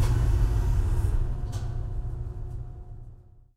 Sound of switching off the furnace.